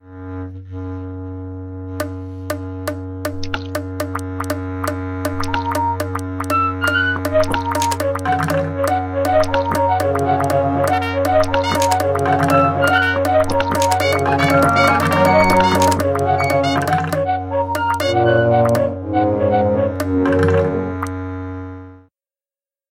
wayside school orchestra
Created to sound like a bizarre orchestra with Seuss-esque instruments. Most 2-second segments are loopable, enabling each section to be called on a cue for a production of Sideways Stories from Wayside School.
Sounds used: